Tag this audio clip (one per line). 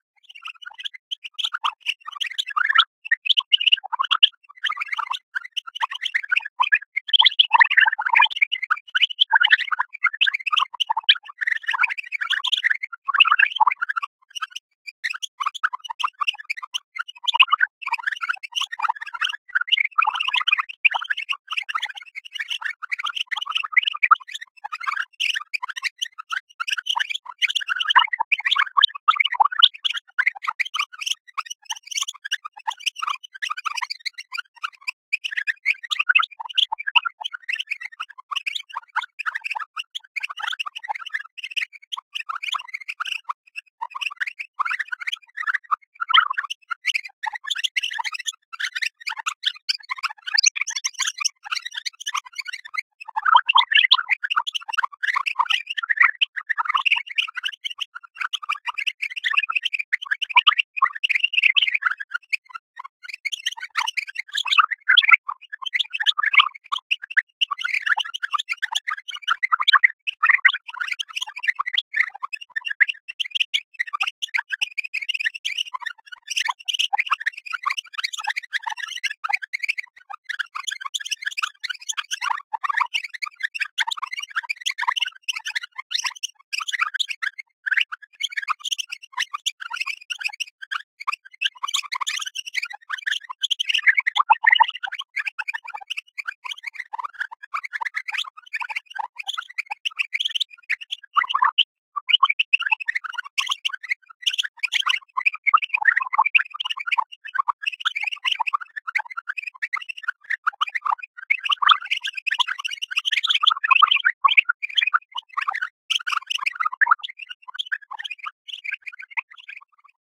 soundscape
reaktor
experimental
ambient
space
drone